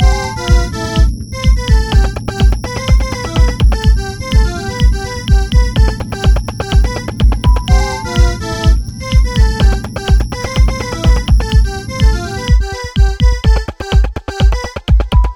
A simple tune which is different but catchy.
This was created from scratch by myself using psycle software and a big thanks to their team.
game, rave, synth, techno, experimental, electro, club, waawaa, electronic, intro, blippy, gaming, drum-bass, hypo, dub-step, glitch-hop, loopmusic, bounce, dance, drum, ambient, beat, game-tune, loop, bass, Bling-Thing, effect, acid, trance, dub